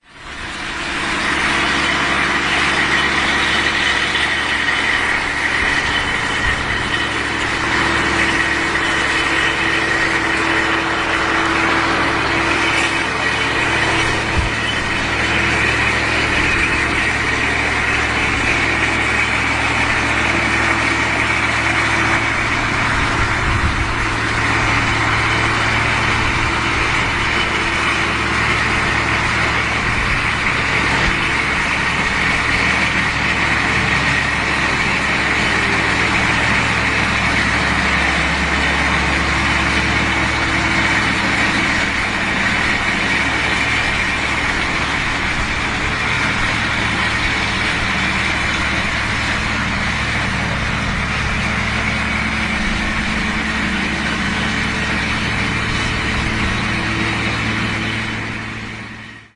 23.11.09: about 11.00 in the Karol Marcinkowski park located between Niepodległości Av. and Towarowa street in the center of Poznań (Poland) near of the PKS and the PKP. the recording of the passing by tractor with dry leaves (a autumn cleaning in the park).
in the background typical street noise. no processing: only fade in/out.